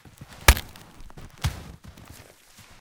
branch break snap crunch nice
crunch, break, snap